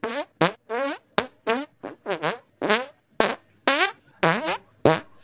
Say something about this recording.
Extremelly Farting
Not real fart
Microphone not been harmed (:
fart, smell, people, smelly, bad